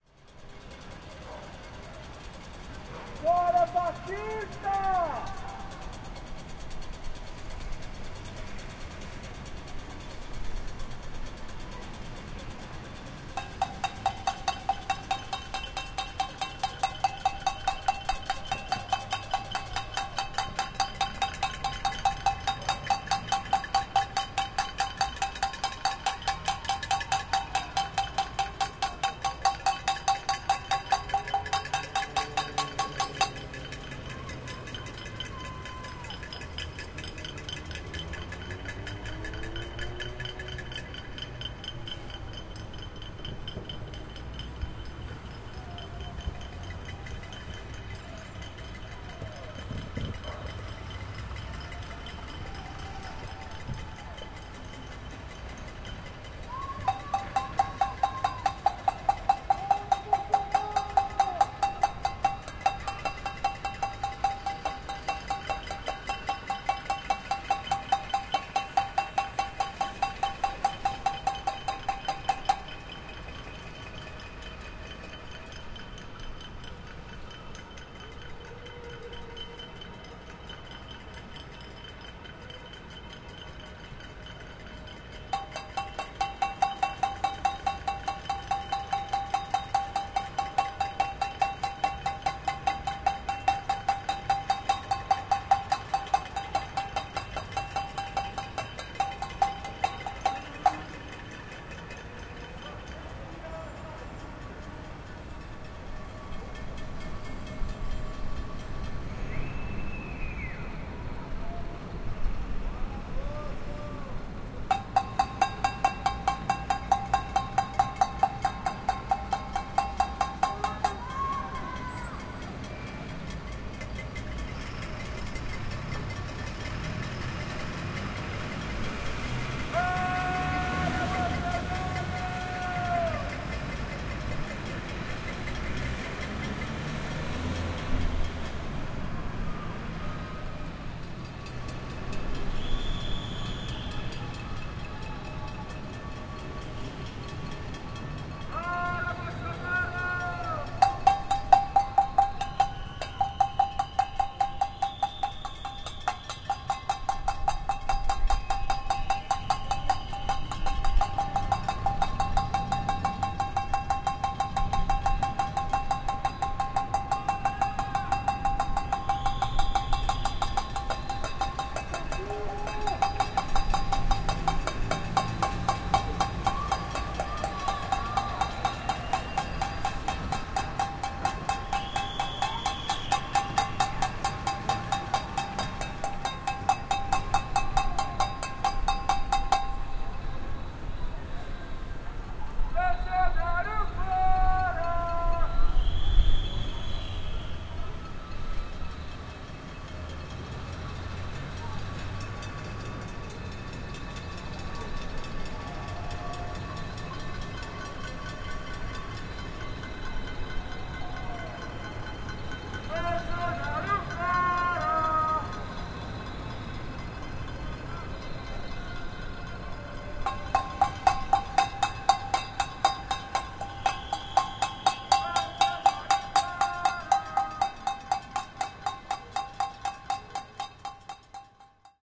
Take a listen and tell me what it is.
Panelaço Fora Bolsonaro no centro de Belo Horizonte 29/03/20
Against Bolsonaro, people bang pans and scream at the windows of their apartments at night in downtown Belo Horizonte.
13th Day of protests in face of the crisis triggered by the Brazilian president after his actions while COVID-19 spreads across the country.
Recorded on a Zoom H5 Recorder.
antifa, corona, covid19, fora-bolsonaro, pans, protest